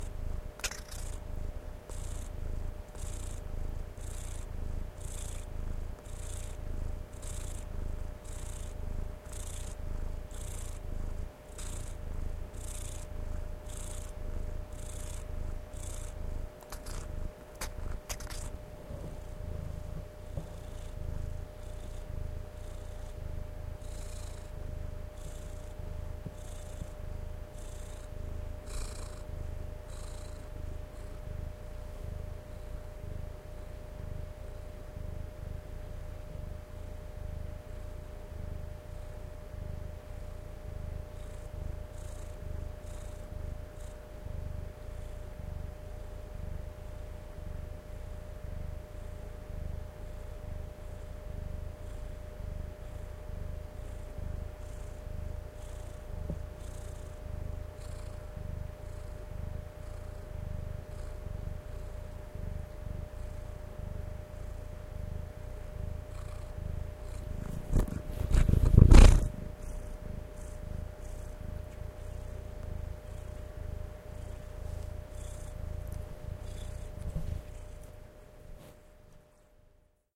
Awesome purring session.
purring, purr